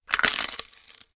Crunching and squishing a crackly thing. In this case, an egg-shell, but it could be anything you might imagine it being. See the pack description for general background.